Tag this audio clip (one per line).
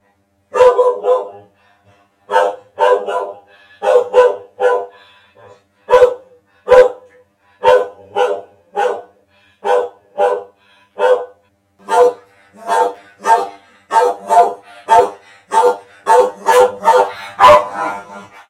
Audacity
dog
robot